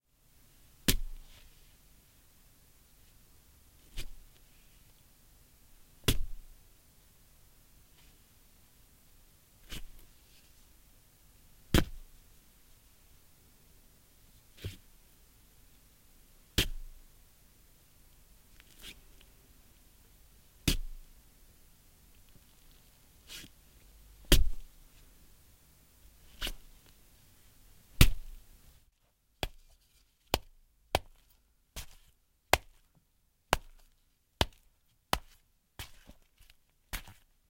Melon Stabs (Clean)
We stabbed some melons to make sound effects for someone being stabbed by a knife.